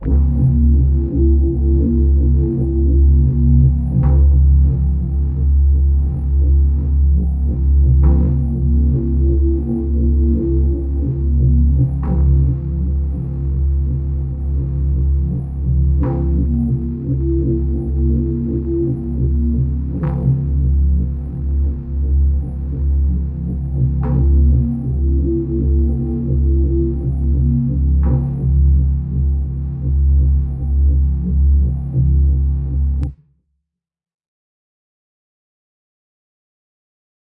Freeze 4-Audio-55
The result of combining two free vst synths, for some reason it ended up sounding sort of tribal after processing it with a ****-ton of vsts.
algon, supertron, vst, tribal, soundscape, ambient